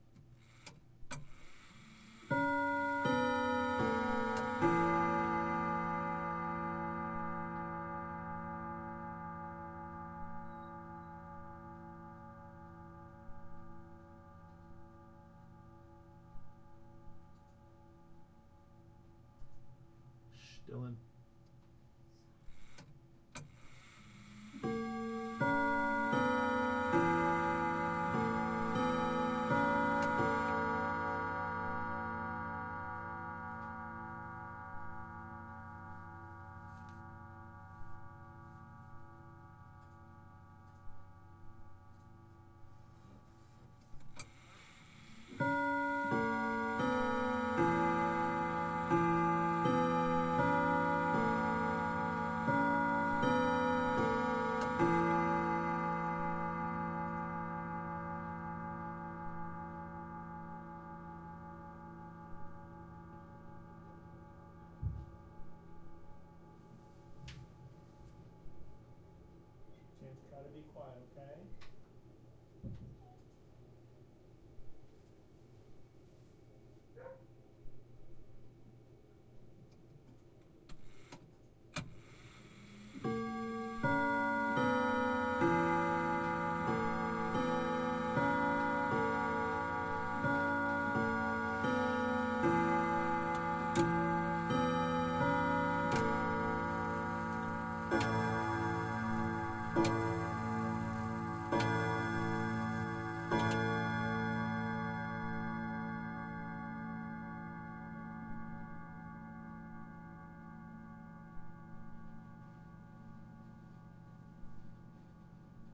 Grandfather clock 2
Grandfather Clock - Westminster chimes - just chimes - NO clock ticking - recorded with Zoom H4